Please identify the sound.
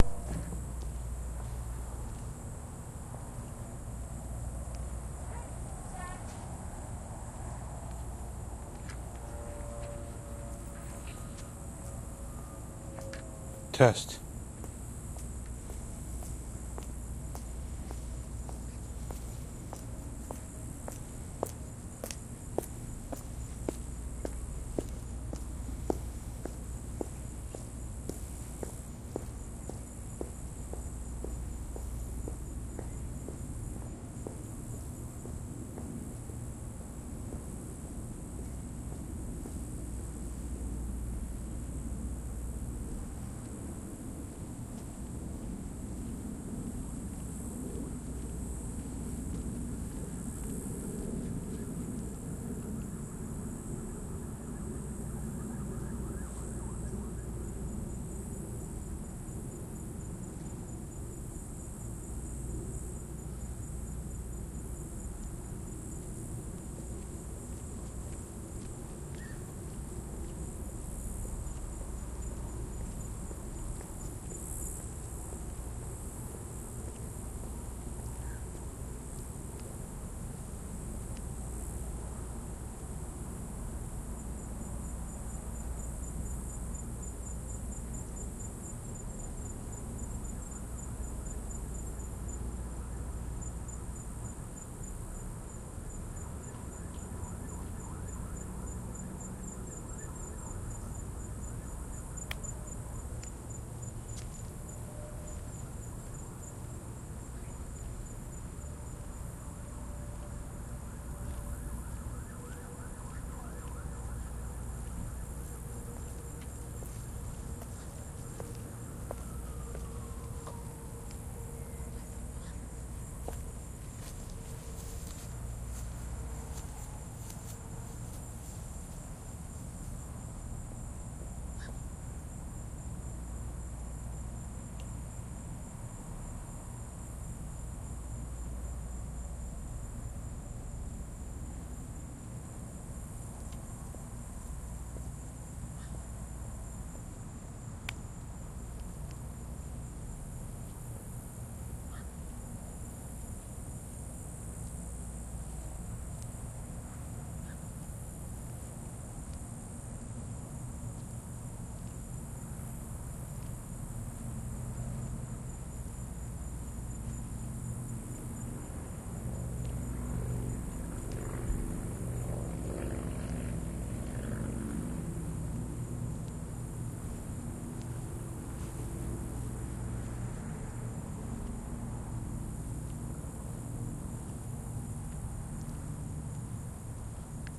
SonyECMDS70PWS walkingtest
digital, field-recording, electet, microphone, test, walking